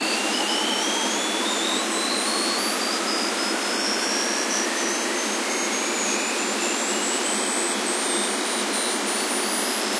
Another batch of space sounds more suitable for building melodies, looping etc. See name for description.